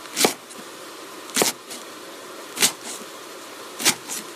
prei snijden hakken
Chopping a leek on a synthetic chopping board. Recorded with an iPhone 6.
leek, chopping, cooking, cut